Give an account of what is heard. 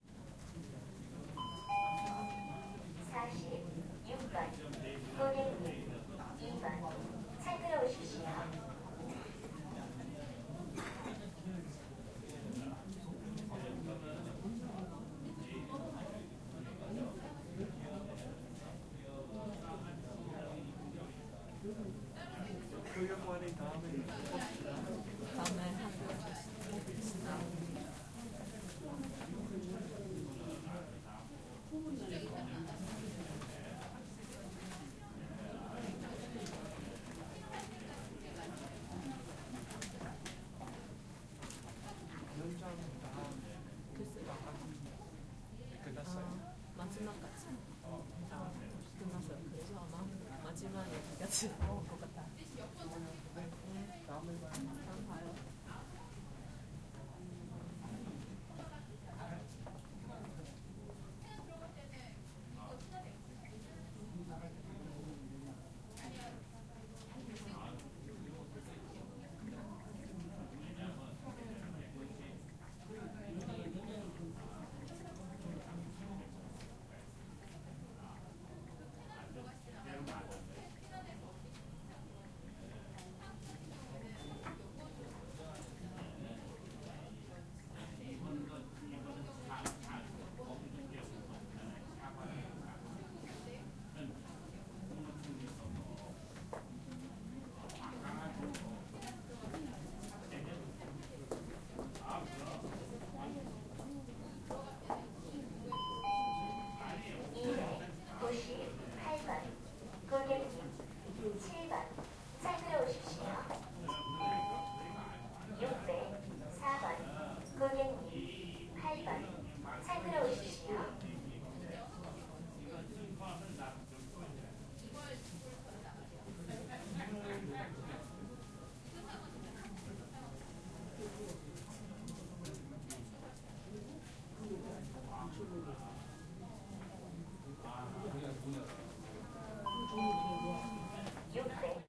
0182 Inmigration office
Immigration office. Sound from the speakers: next. People talking in Korean. Cellphone dial and music in the background.
20120215
korea, bell, voice, field-recording, korean, alarm